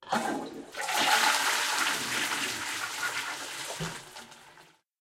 Toilet Flush
plumbing, bathroom, water, toilet, gush, flush, drip